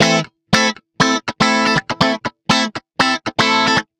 cl min a3
Some clean, minor, rhythmic riff on stratocaster guitar. Recorded using Line6 Pod XT Live.
clean, guitar, minor, rhythmic